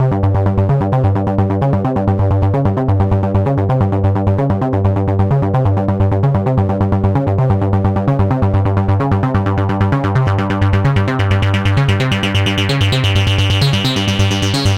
Acid Bass Loop 130BPM Gm
Sample from my latest free sample pack. Contains over 420 techno samples. Usefull for any style of electronic music: House, EDM, Techno, Trance, Electro...
YOU CAN: Use this sound or your music, videos or anywhere you want without crediting me and monetize your work.
YOU CAN'T: Sell them in any way shape or form.
electronic, hard, horror, loops, oneshot, sound